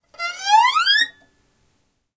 Violin, Glissando, Ascending, A (H4n)
Raw audio of a quick violin string glissando ascending. Recorded simultaneously with the Zoom H1, Zoom H4n Pro and Zoom H6 (XY Capsule) to compare the quality.
An example of how you might credit is by putting this in the description/credits:
The sound was recorded using a "H4n Pro Zoom recorder" on 11th November 2017.
glissandi, violin, ascending, string, H4, rising, glissando